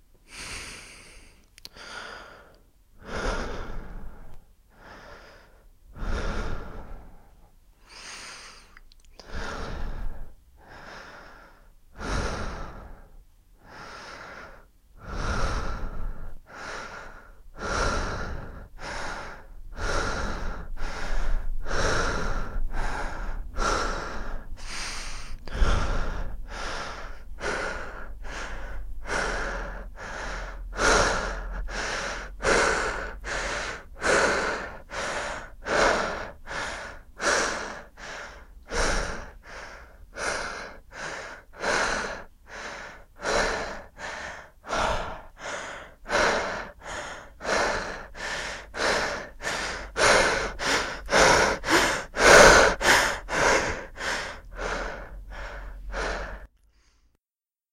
Man breathing - 3
A man breathing deeply.
Recorded with an Alctron T 51 ST.
{"fr":"Respiration Masculine - 3","desc":"Un homme respirant profondément.","tags":"respiration masculine homme humain corps efforts sport"}
body, human, sport, efforts, breathing, man, masculine